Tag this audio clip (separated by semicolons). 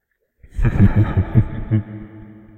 evil; terror; suspense; creepy; sinister; fear; scary; spooky; horror; drama; haunted